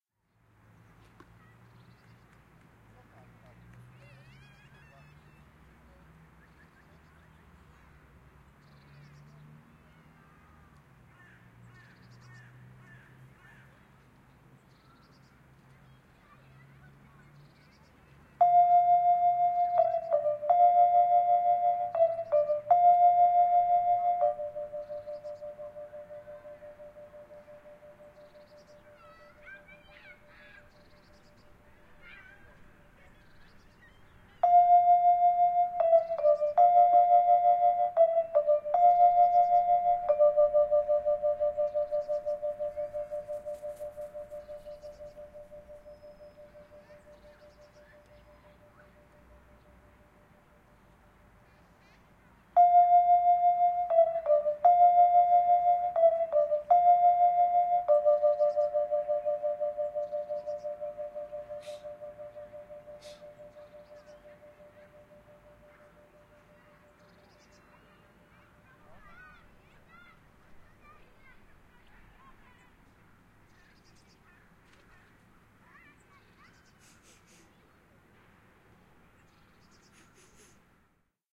Targowek-Park-Brodnowski-godzina-14-45
PL: Nagranie zegara z Parku Bródnowskiego na Targówku w Warszawie.
ENG: Recording clock Bródno park Targowek in Warsaw.
bell, bells, clock, ring, Targ, wek, zegar